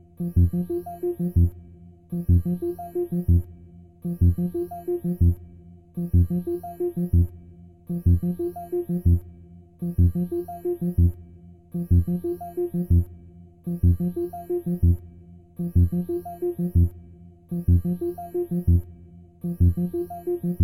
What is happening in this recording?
Alarm sound 1
A futuristic alarm sound
Electronic, Whistles, Futuristic, Bells, Sci-Fi, Noise, Space, Alarms